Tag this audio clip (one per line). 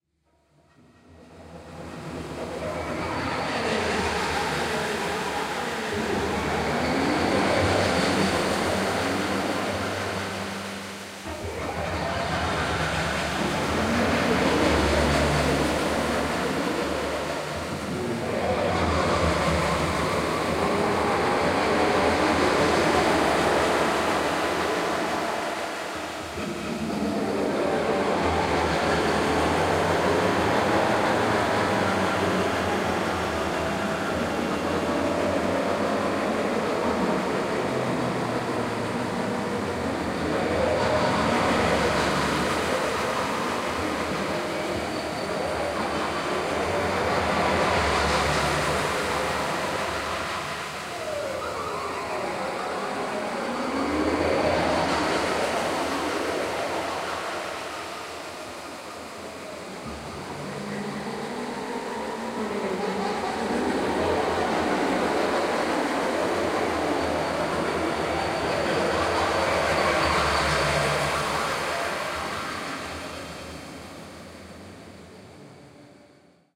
weird
futuristic
fantasy
sci
magical
strange
future
fi